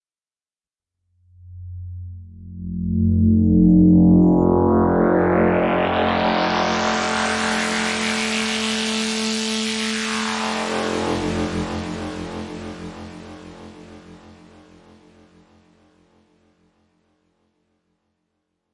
cine rotor1
made with vst instruments
ambience, ambient, atmosphere, background, background-sound, cinematic, dark, deep, drama, dramatic, drone, film, hollywood, horror, mood, movie, music, pad, scary, sci-fi, sfx, soundeffect, soundscape, space, spooky, suspense, thiller, thrill, trailer